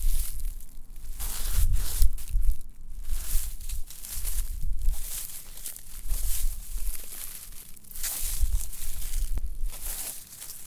Crunching Leaves
Stepping in dry leaves.
Crunching, Field-Recording, Leaves, Walking